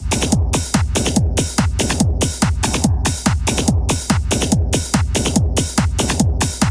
4-bar-techno-loop made with rebirth. 143 bpm. slightly improved with some dynamics. before i provided a rebirth-mod with samples from thefreesoundproject.